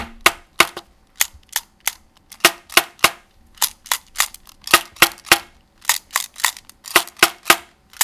Mysounds HCP Naïg sweet&watch
This is one of the sounds producted by our class with objects of everyday life.